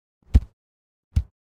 Lacrosse catch
A ball is catched with a lacrosse stick. Recorded with a Rode NTG3 microphone + Zoom H4n.